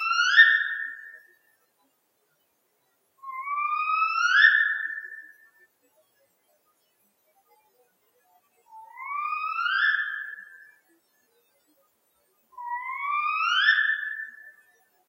Spotted Owl2 no noise
A noise reduced version of shaka9's "Spotted Owl2".
field-recording, owl, spotted